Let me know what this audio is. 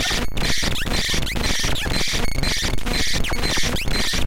Punishments In Installments - Small Self-Scourgings -626
I had a goal for this pack. I wanted to be able to provide raw resources for anyone who may be interested in either making noise or incorporating noisier elements into music or sound design. A secondary goal was to provide shorter samples for use. My goal was to keep much of this under 30 seconds and I’ve stuck well to that in this pack.
For me noise is liberating. It can be anything. I hope you find a use for this and I hope you may dip your toes into the waters of dissonance, noise, and experimentalism.
-Hew